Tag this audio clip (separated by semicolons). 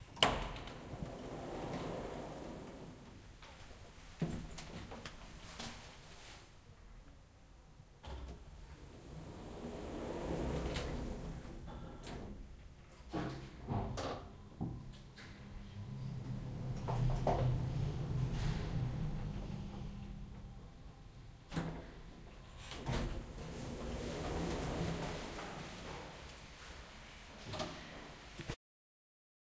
Field-Recording; Cologne; University; Machine